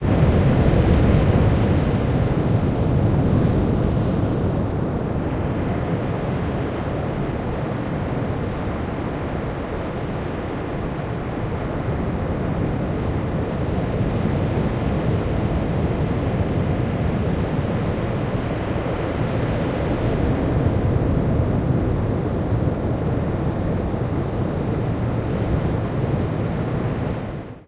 Standing up the beach in the sand dunes listening to the sea. Sorry it's a bit short I will return to the beach later with my field recording rig.
soft, adelaide, rumble, stereo, coast, wind, beach, sand-dunes, christies-beach, bleak, waves, sea, ocean, field-recording